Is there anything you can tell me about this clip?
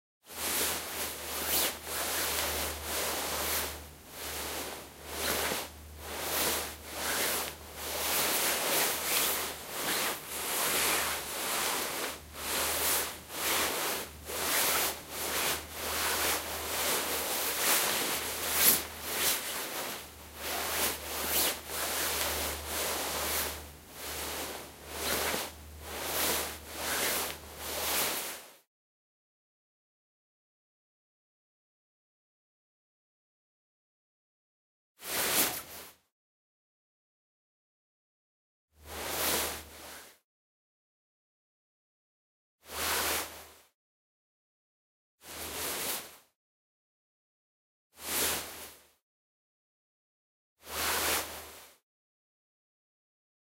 walking slipper fabric rhythm
hiking with slippery fabric coat
clothing, fabric, polyester, rhythmic, slippery